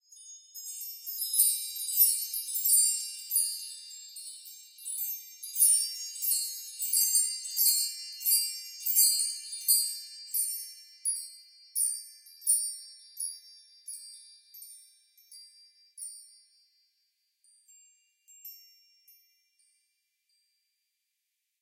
Meinl 12 bar chimes swishing randomly.
16 bit 44.1Hz